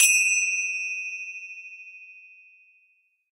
Zyl Finger Cymbals Open
Finger cymbals spread open to resonate freely.